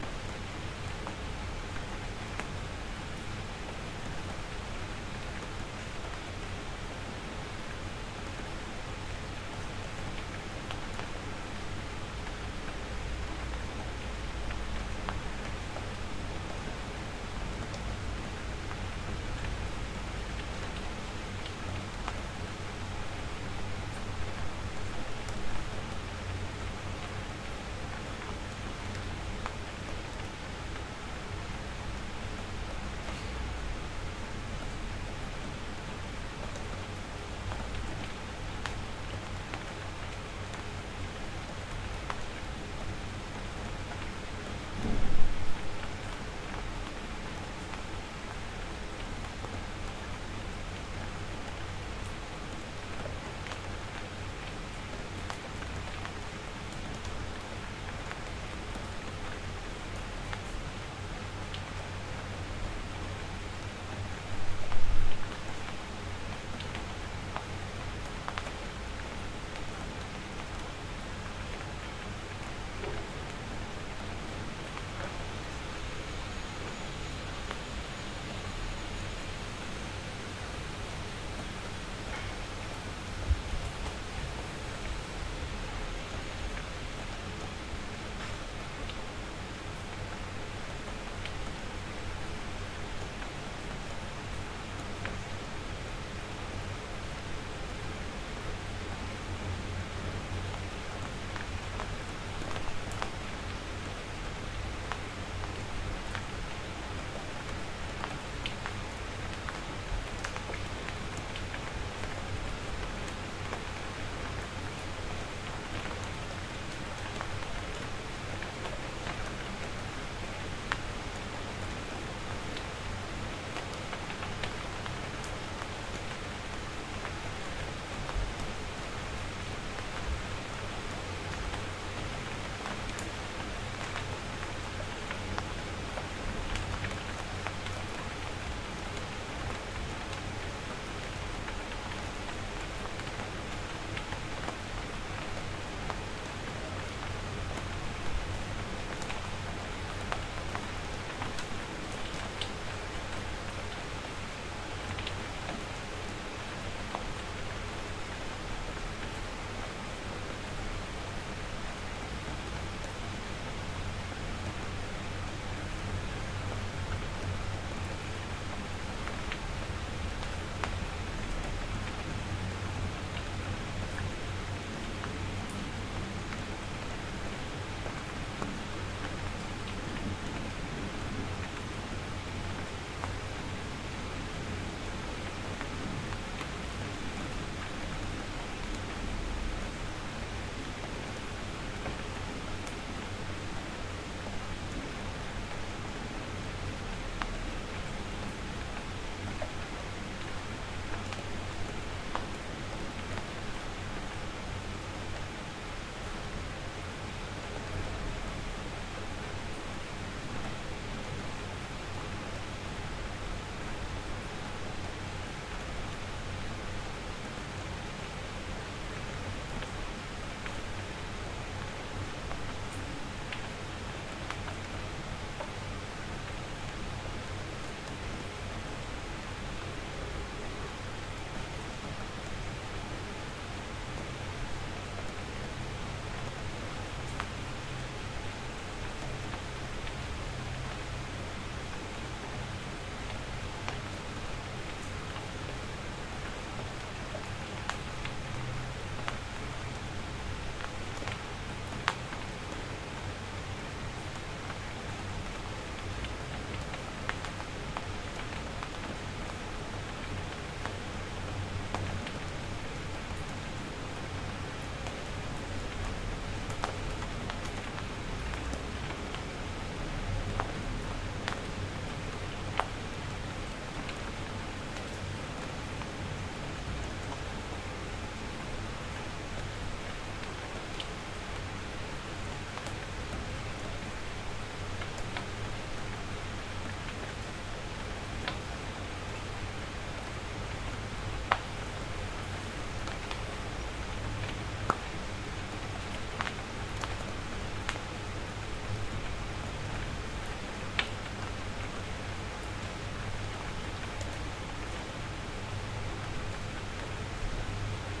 Rain from Half Open Window 1
XY-stereo recording made with RØDE NT4 microphone and MOTU Ultralite MK3. /// Rain falling against my room's walls and windows, and some more direct raindrops falling into the open window. /// Recorded in Amsterdam West.
amsterdam, atmosphere, bad, city, drip, dripping, drips, drops, drup, drupping, drups, NT4, rain, raindrops, raining, rains, Rode, room, stereo, town, water, weather, window, windows, xy